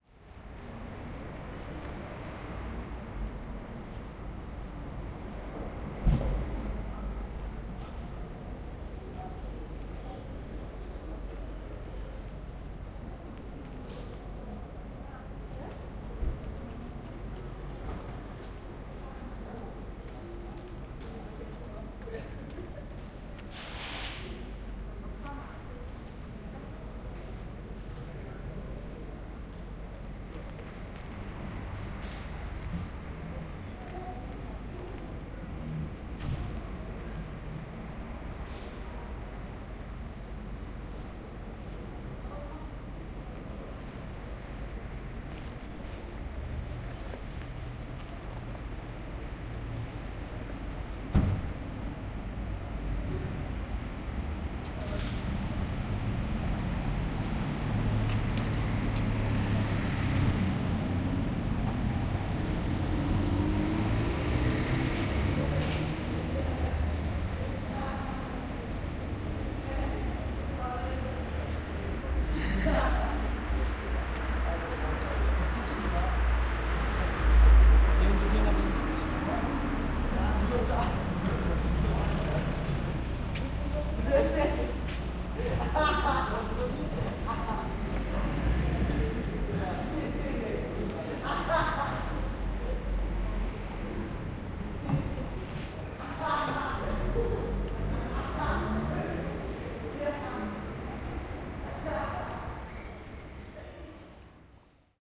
street crickets 120711
12.07.2011: about 22.20. Maleckiego street in Poznan/Poland. ambience of the quite narrow street of 4-5 floor old tenements. sound of crickets, passing by boys who laugh, drone of cars on cobbled road.